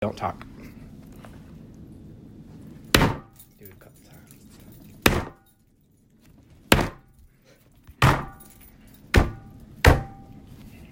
Table Slam
Adam slamming his fist on a wooden table.
table, pound, knocking, fist